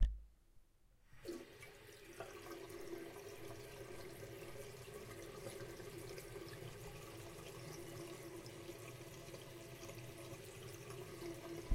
Running Tap 001
Running a tap in a small bathroom.
sink, bathroom, short, Water, running, tap